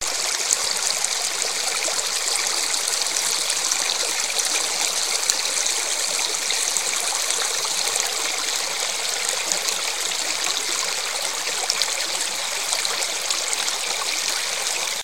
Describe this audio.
Creek 04 (loop)
Sound of a creek
you can loop it